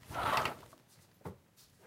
Close curtains
blinds, close, closing, curtains, opening, window